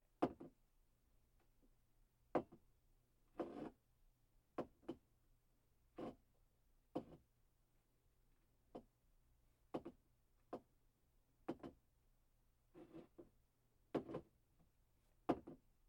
Setting Coffee Cup Down
A cup being set down.
set, down, cup